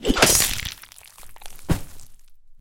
Beheading SFX
beheading, gore, gross, mix, slice